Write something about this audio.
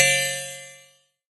Generated by this program.
Gain envelope was added with Audacity after rendering.
cymbal, 1-shot